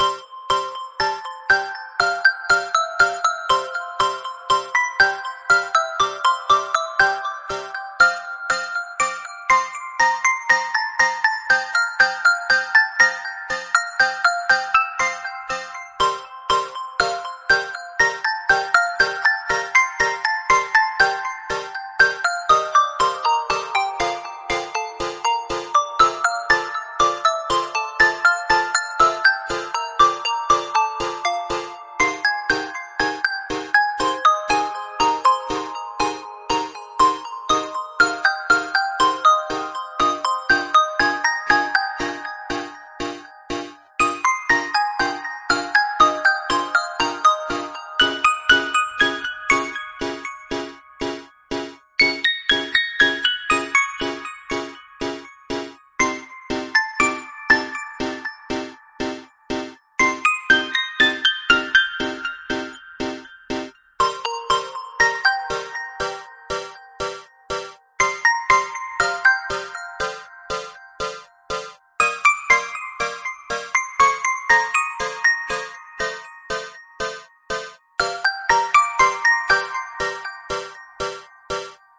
Modulating harmonic sequence with the Helm and Calf Organ synthesizers, sequenced using Ardour.